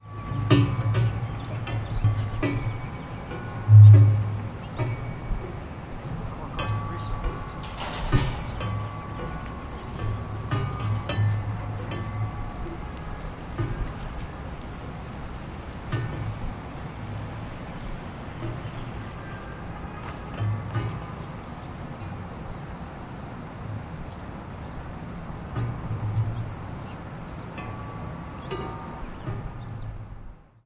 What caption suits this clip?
Field-recording from the public art sculpture "The Bingle Tree" (2014) by David Holcomb in Piedmont Park, Atlanta, GA, USA. As described in the sign, "This wind chime is made from recycled materials collected from a demolition project on Juniper Street, Atlanta GA, in 1980. Each eight foot arm supports a chime, and each chime rings independent of the others."
Recorded on November 6, 2016, with a Zoom H1 Handy Recorder.